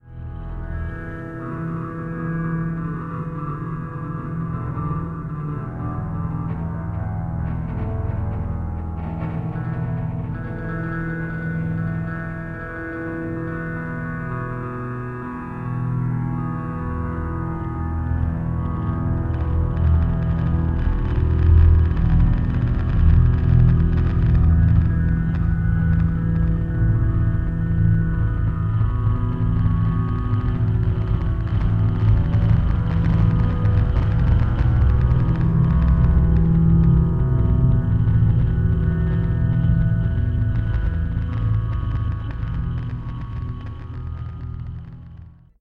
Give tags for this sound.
Ambient
Granular
Soundscape

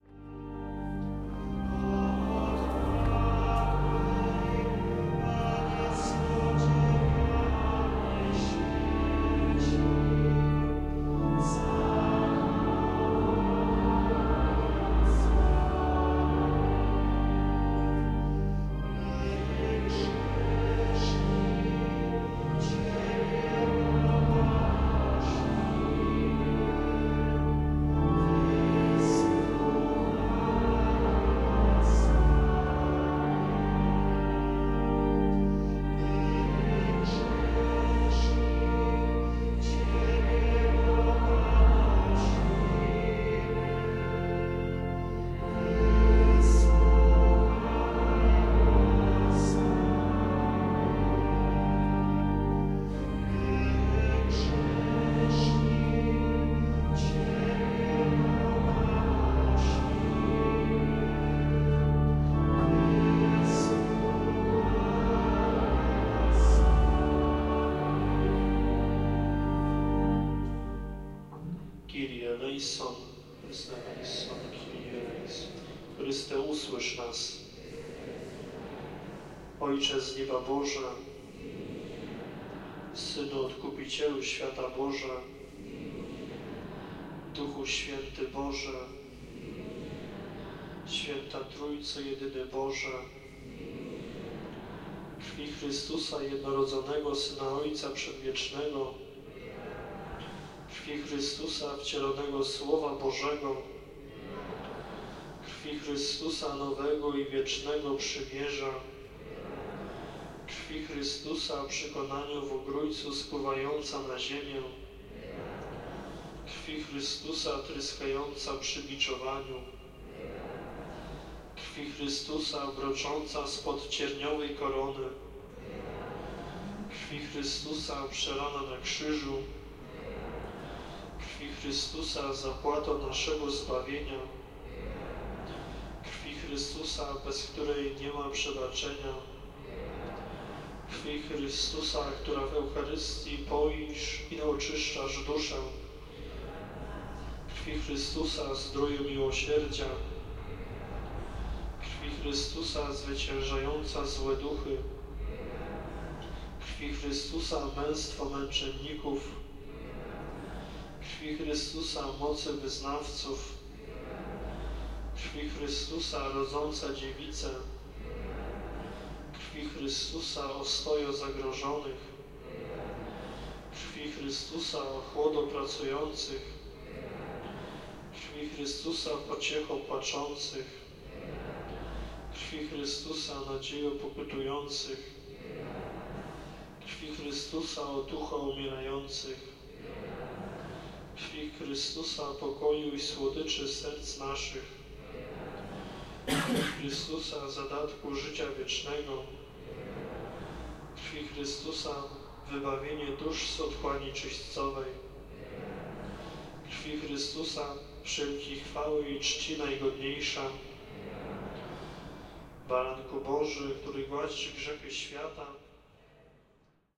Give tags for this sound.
Bitter-Lamentations
catholic
church
field-recording
music
organ
pipe-organ
Poland
Poznan
prayer
priest

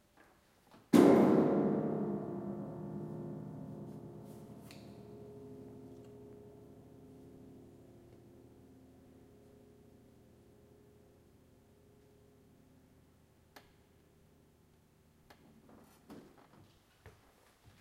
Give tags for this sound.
Low
resonant
piano-key
strike

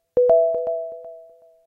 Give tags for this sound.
power-up
game
energy
life
pick-up
object

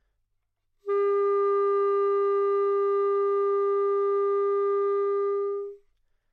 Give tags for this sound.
clarinet
G4
good-sounds
multisample
neumann-U87
single-note

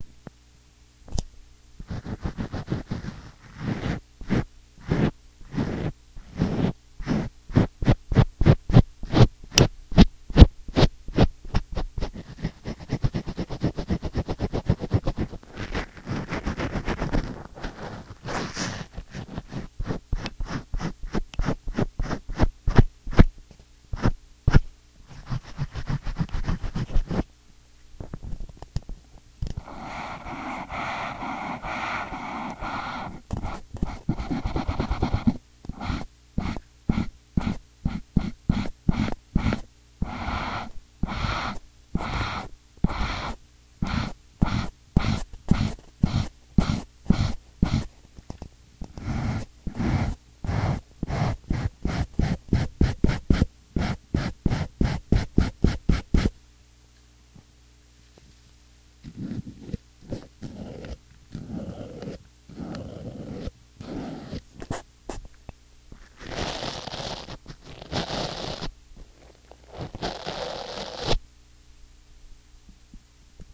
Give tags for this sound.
contact-mic
perception

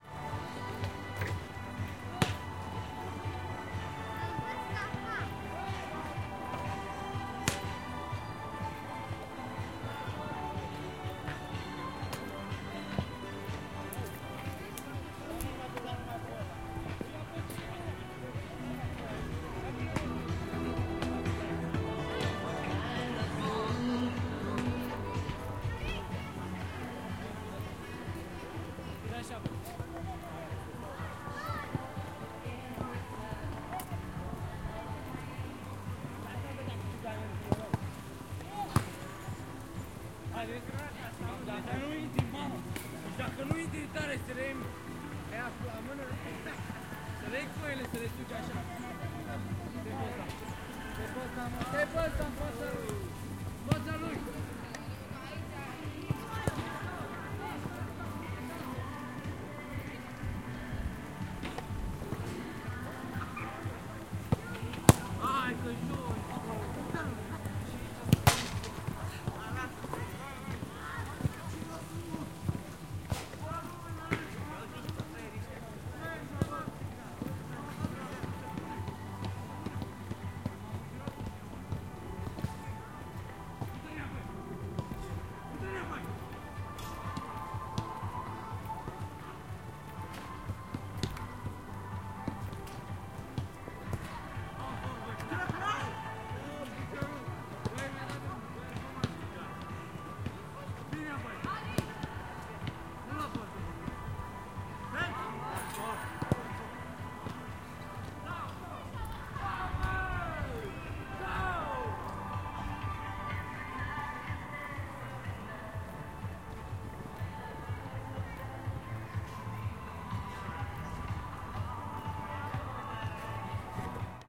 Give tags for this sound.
ambient
field-recording
park

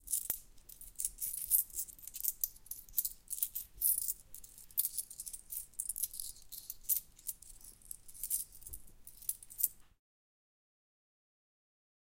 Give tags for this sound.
CZ
cash
Panska
coin
shopping
money
hand
shoppingcentre
coins
Czech
Pansk

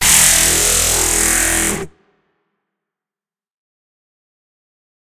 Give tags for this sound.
audio
bass
bwarrhhhh
drop
dubstep
electro
fx
logic-pro
music
other-shit
sound-design
synth
vocoder
wubs

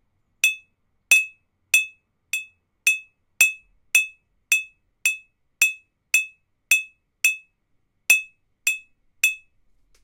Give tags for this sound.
bell
metal
glass
ring
ting
clang
steel
hit
ding

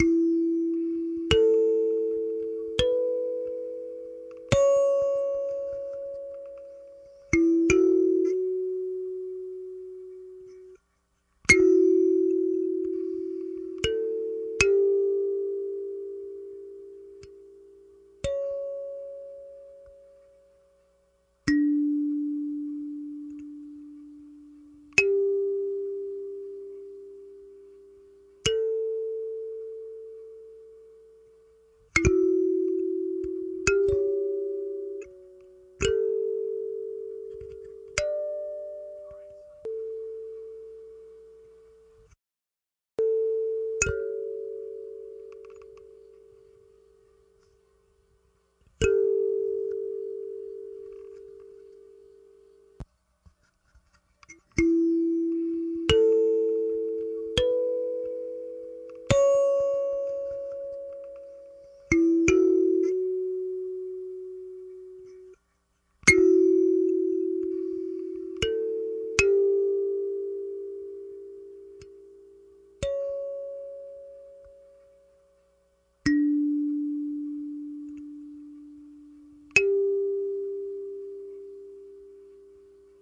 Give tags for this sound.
mgreel
morphagene
piezo
kalimba

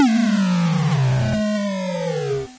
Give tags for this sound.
8
bit
retro
sample

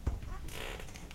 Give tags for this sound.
field-recording
chair
wood

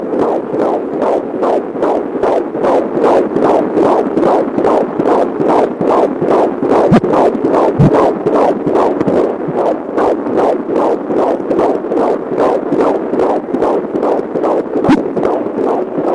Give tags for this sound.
doppler
fetus
heartbeat
lofi
squelchy
stethoscope

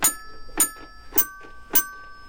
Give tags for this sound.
baby
xylophone

